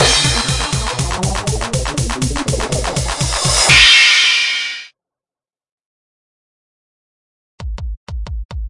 Just an Intro Thing
awesome, good, high-quality